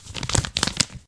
The sound of paper
crumple, flip, fold, folding, map, newspaper, page, paper, reading, turn